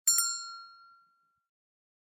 Calling Bell 02
Bell, Ringing